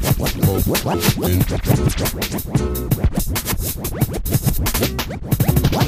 92bpm QLD-SKQQL Scratchin Like The Koala - 018
record-scratch, turntablism